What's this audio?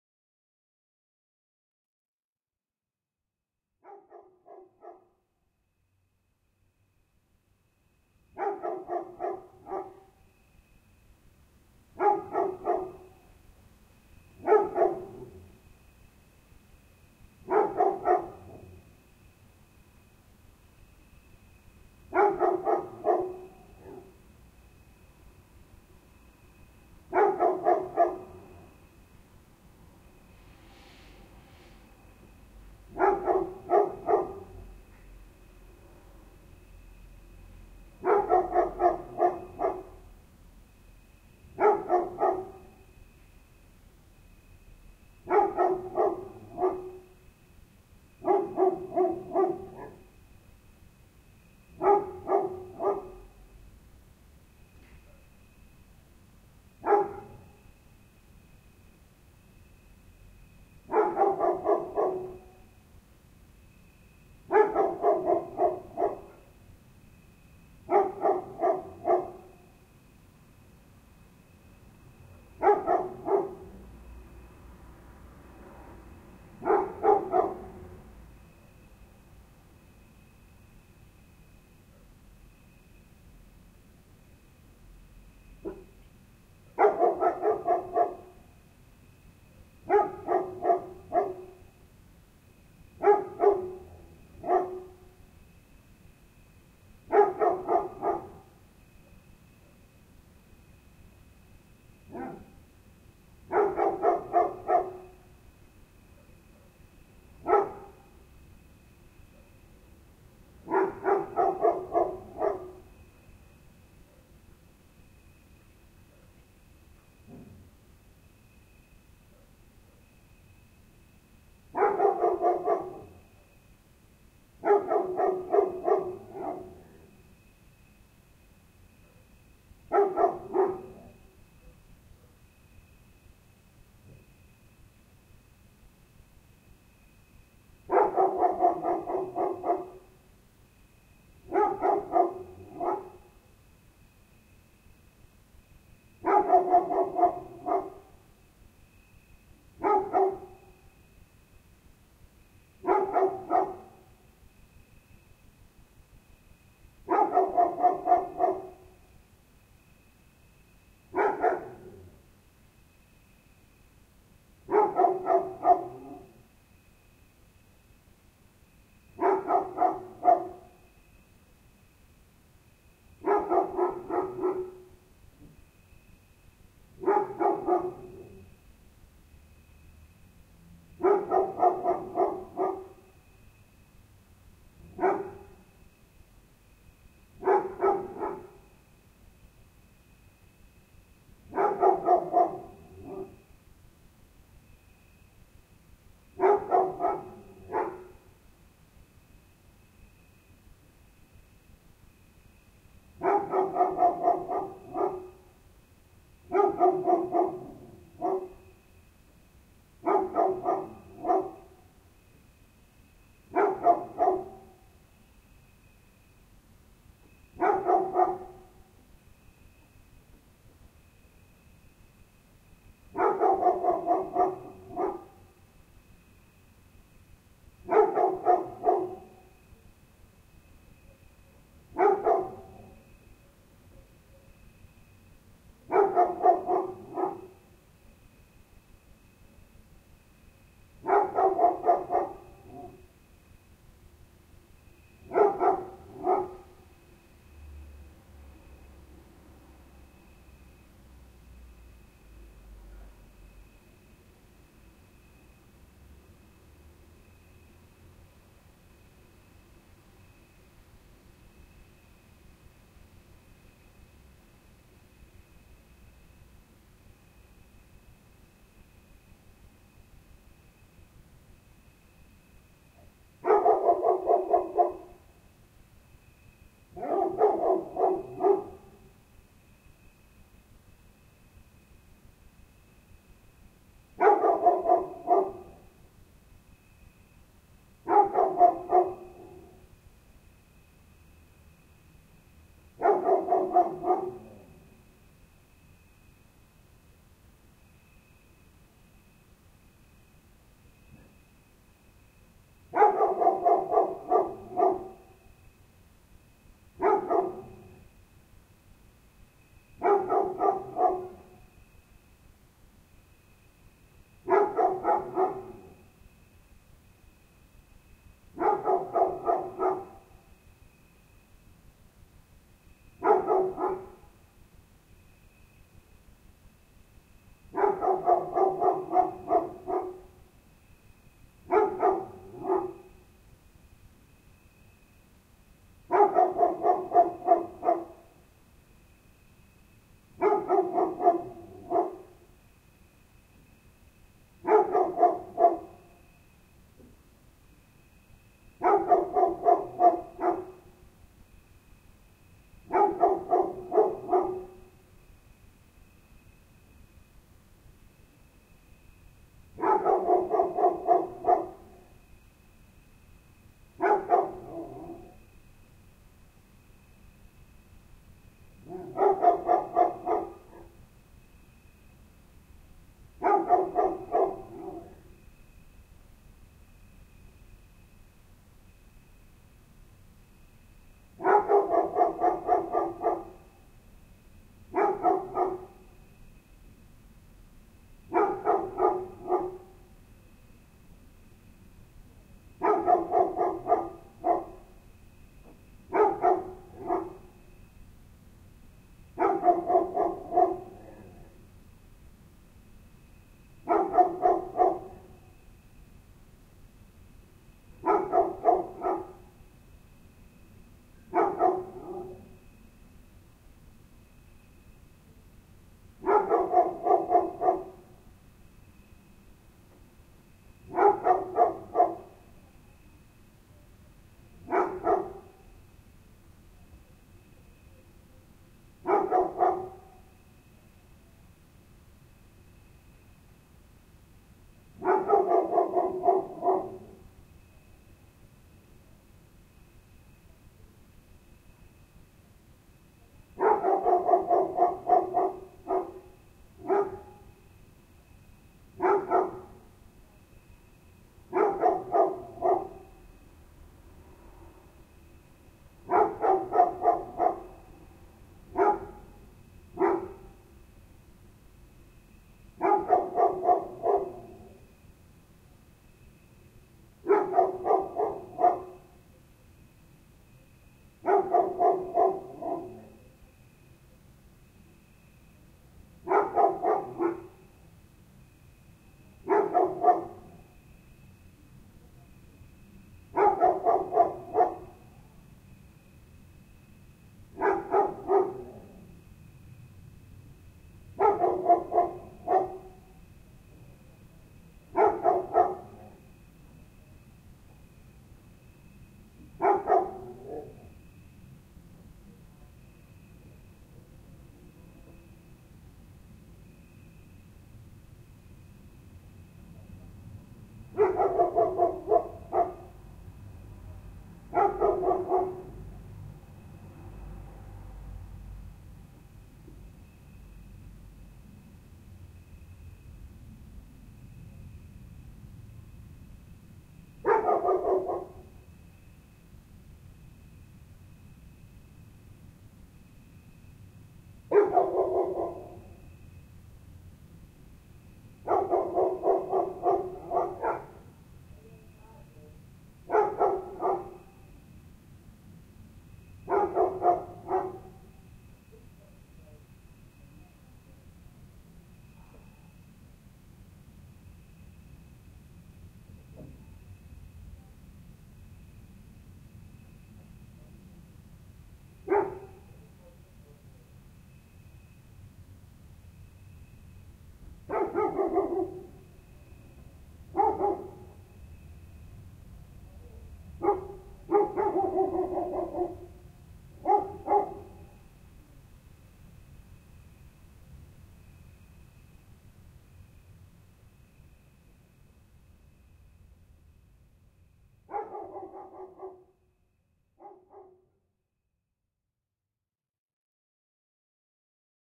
Dog bark, Cricket, Rumble, Traffic, Human movements, Open window, -23LUFS
Recorded in Budapest (Hungary) with a Zoom H1.
growling, insects, night, dog, movement, sigh, growl, animal, field-recording, barking, bark, traffic, cricket, nature, human, talk, rumble, window, distant, ambience, open